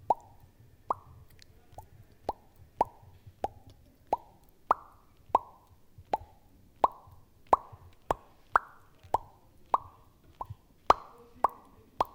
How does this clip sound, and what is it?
pop mouth cartoon
Poppin up some fruits from ground
funny, pop, mouth, cartoon